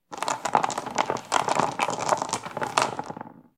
Dice sounds I made for my new game.